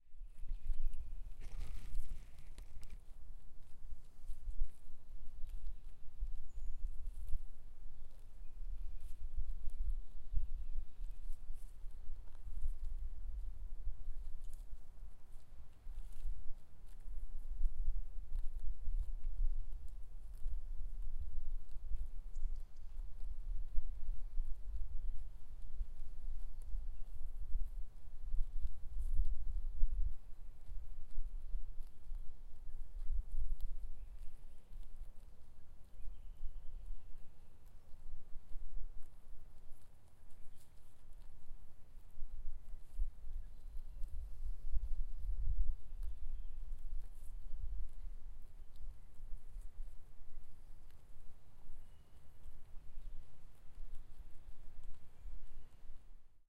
Sheep Grazing 1
Sheep grazing in a field. (New Zealand)
Ambience, Farm, Field, Wind, Park, Day, Atmosphere, Outside, Animal, Baa, Sheep, Outdoors